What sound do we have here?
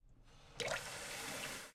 Turning on faucet
Turning on a faucet.
turning-on, water, faucet